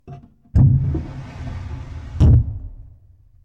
This is the result of got from putting my zoom inside a draw and closing it.